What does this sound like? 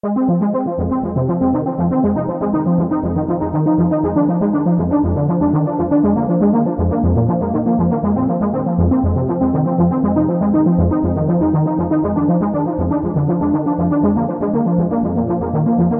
Arp Synth in Fm 120bpm
This is an arpeggiated synthesizer in F minor and at a tempo of 120bpm.